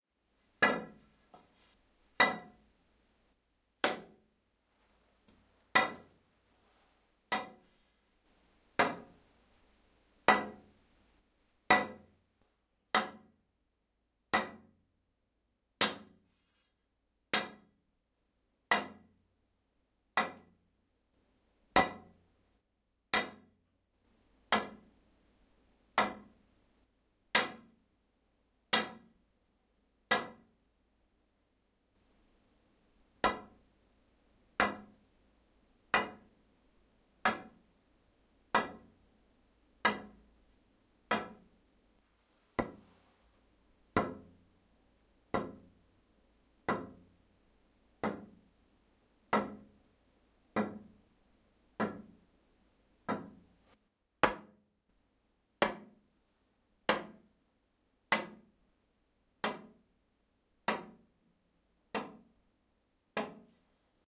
Wood rod hit floor
impact, wood, hit, foley